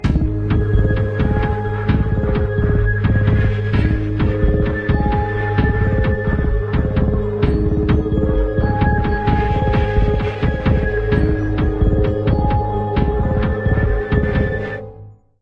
I wanted to have a decent ring tone sound, not something too cheesy and also something recognisable, thus I spawned this baby from a parody of a tsfh track.